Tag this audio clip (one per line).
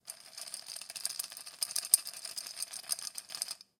ice-cube
ice-cubes
cold
shook
shaking
cup
glass
shaken